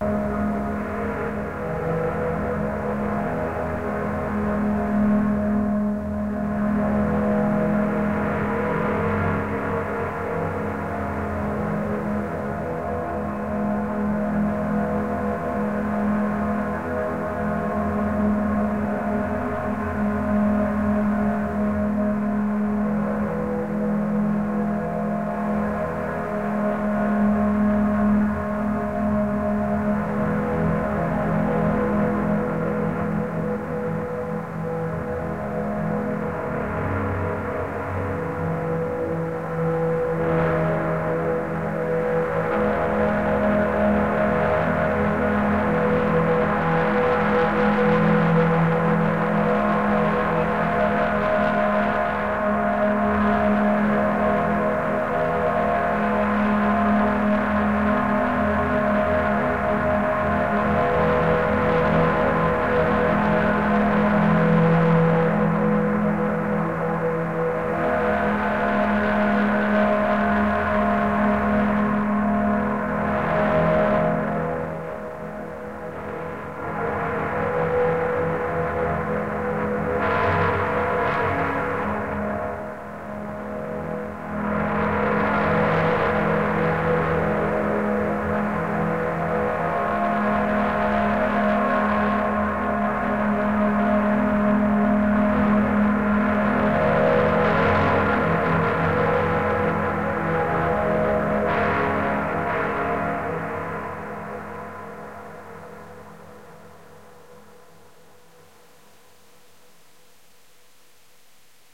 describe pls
404440 C S erokia corsica-s-sigj-erokia-remix-1-140bpm
remix, ambient, atmosphere, drone, soundscape, erokia, dark, pad, cinematic